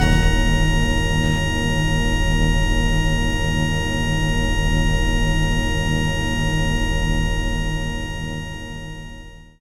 PPG Digital Organ Leadpad E1
This sample is part of the "PPG
MULTISAMPLE 002 Digital Organ Leadpad" sample pack. It is an
experimental sound consiting of several layers, suitable for
experimental music. The first layer is at the start of the sound and is
a short harsh sound burst. This layer is followed by two other slowly
decaying panned layers, one low & the other higher in frequency. In
the sample pack there are 16 samples evenly spread across 5 octaves (C1
till C6). The note in the sample name (C, E or G#) does not indicate
the pitch of the sound but the key on my keyboard. The sound was
created on the PPG VSTi. After that normalising and fades where applied within Cubase SX.
digital, experimental, multisample, ppg